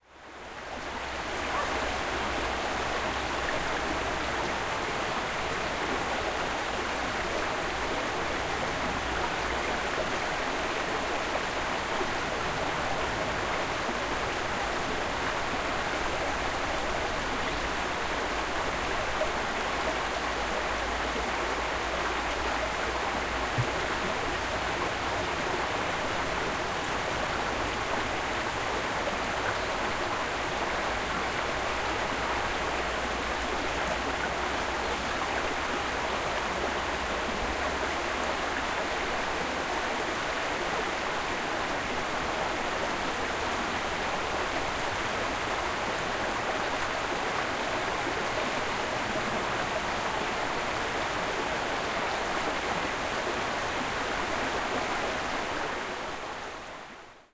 Alanis - Brook near the Chapel - Arroyo camino de la ermita (II)
Date: February 23rd, 2013
There's a brook in the road to a Chapel called 'Ermita de las Angustias' in Alanis (Sevilla, Spain). I recorded some takes in different parts of its stream.
Gear: Zoom H4N, windscreen
Fecha: 23 de febrero de 2013
Hay un arroyo en el camino a la ermita llamada "Ermita de las Angustias" en Alanís (Sevilla, España). Hice algunas tomas en diferentes partes de su recorrido.
Equipo: Zoom H4N, antiviento
Alanis, Espana, Sevilla, Spain, agua, arroyo, brook, field-recording, grabacion-de-campo, liquid, liquido, rio, river, water